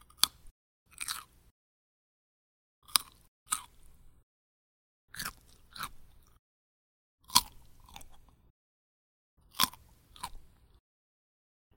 Chomp Chew Bite
Chewing a carrot.